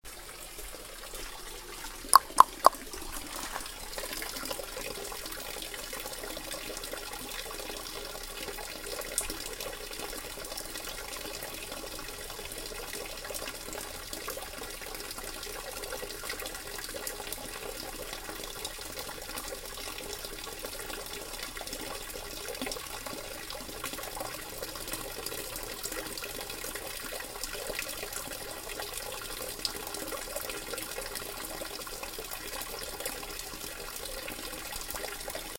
single stream water fountain on a rainy day.